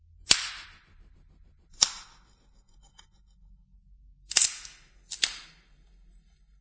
Snapping sticks and branches 11
Snapping sticks and branches
Digital Recorder
branches, break, breaking, lumber, snap, snapping, sticks, timber, wood, wooden